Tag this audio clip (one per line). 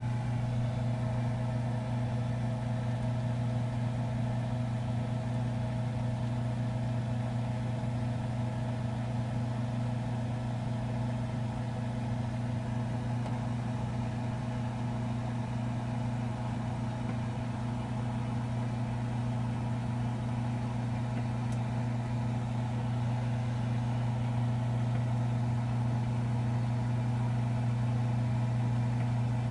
Ambience
Appliance
Freezer
Hum
Kitchen